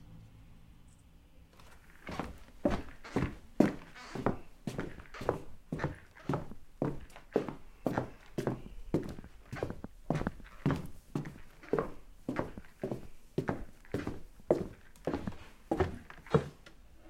Walking On A Wooden Floor
feet, floor, footstep, footsteps, ground, shoes, step, stepping, steps, walk, walking, wood, wooden-floor